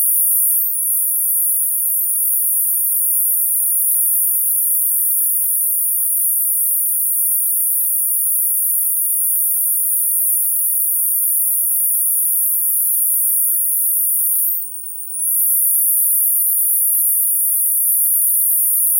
audiopaint cicadas 1 copy
Cicadas-like sound using Audiopaint. Version 1
cicadas, insects, sythetic